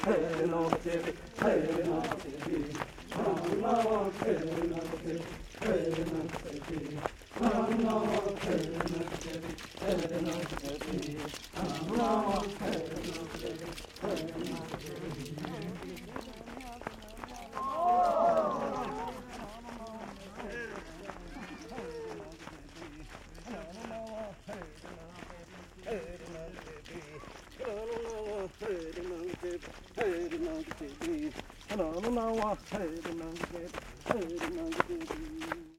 Tapirapé hèlonamotchépi
Tapirape Native indians are singing while dancing in circle.
barefoot steps, sounds from the necklaces,
Mato Grosso, Brazil, 2009
More songs from the Tapirape nation in this pack:
Recorded with Schoeps AB ORTF
recorded on Sounddevice 744T